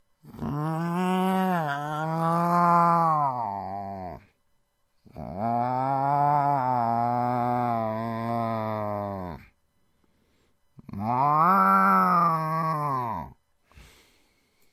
Human Cat
Me trying to immitate an angry cat or simliar feline.